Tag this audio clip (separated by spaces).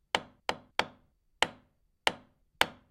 bang bum hammer iron